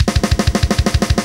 a beat normally used in thrash metal. i made this sample in acoustica beatcraft